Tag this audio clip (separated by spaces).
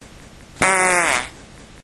poot gas flatulence fart flatulation flatulate